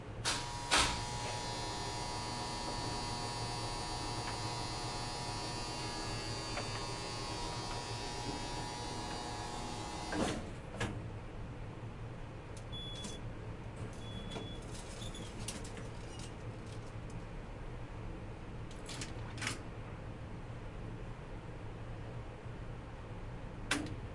Elevator Standard Ride
An old library elevator starts, moves, and stops before its doors are opened.
door, elevator, field-recording, library, motor, opening